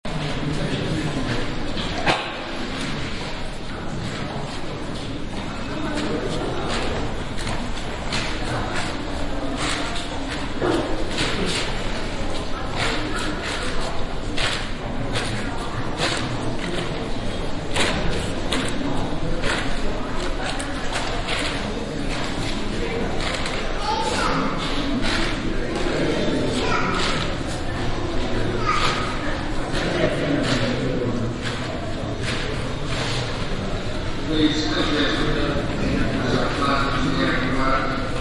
Seven Sisters - Underground station (waiting for train)